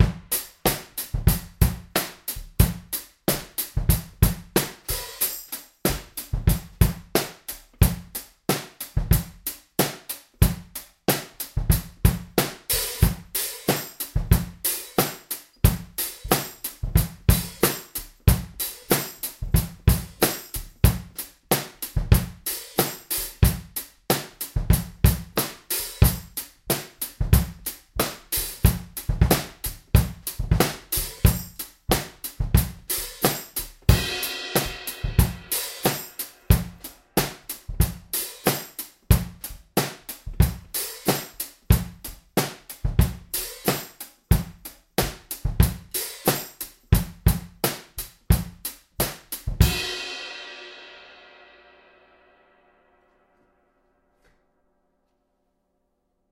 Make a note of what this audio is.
A straight fat drum beat played on my hip hop drum kit:
18" Tamburo kick
12x7" Mapex snare
14x6" Gretsch snare (fat)
14" old Zildjian New Beat hi hats w tambourine on top
18"+20" rides on top of each other for trashy effect
21" Zildjian K Custom Special Dry Ride
14" Sabian Encore Crash
18" Zildjian A Custom EFX Crash